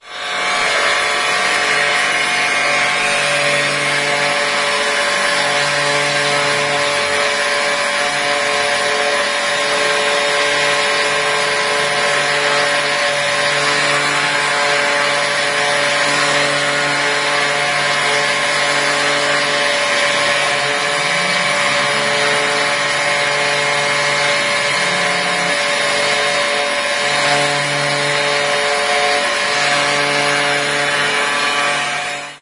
07.11.09: between 13.00 and 15.00, the KAMIEŃ - STONE 2009 Stone Industry Fair(from 4th to 7th November) in Poznań/Poland. Eastern Hall in MTP on Głogowska street; the sound of some masonry machine.
noise, mtp, crowd, stone-fair, machine, stone, poznan, hall, poland, saw, fair, industrial